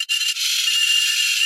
glitch SFX 024
As all files in this sound pack it is made digitally, so the source material was not a recorded real sound but synthesized sequence tweaked with effects like bitcrushing, pitch shifting, reverb and a lot more. You can easily loop/ duplicate them in a row in your preferred audio-editor or DAW if you think they are too short for your use.